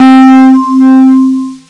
43 vibraphone tone sampled from casio magical light synthesizer